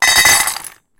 dog food in bowl
Dog food going into a bowl recorded with a Zoom H4n.
bowl, clang, clank, dish, dog, food, metal